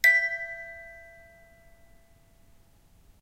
one-shot music box tone, recorded by ZOOM H2, separated and normalized
bell, box, music